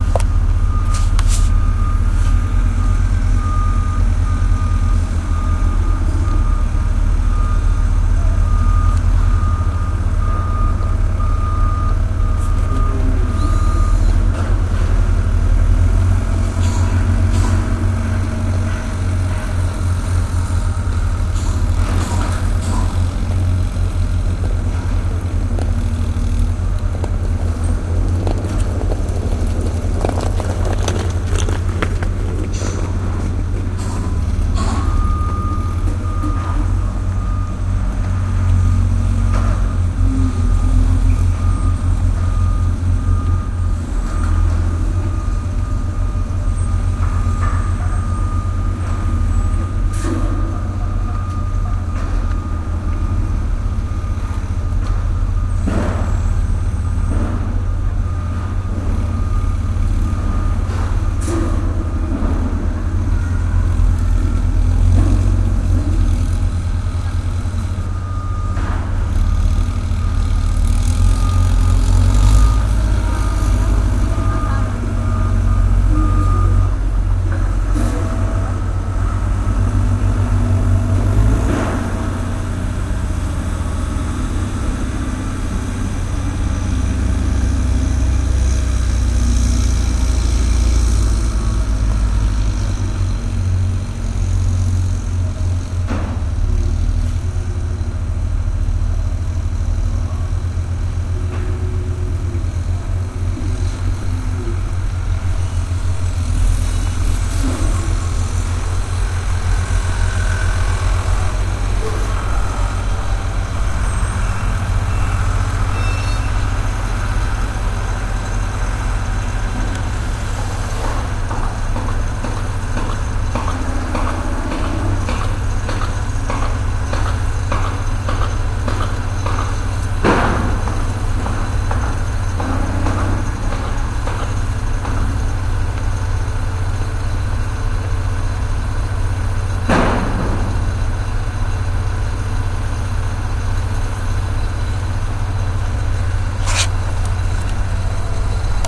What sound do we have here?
softer construction sounds and ambient construction site sound
construction, machinery, machine, industrial, mechanical